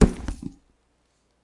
0015 Bag Punch
Recordings of the Alexander Wang luxury handbag called the Rocco. Bag punch
Alexander-Wang, Handbag, Hardware, Leather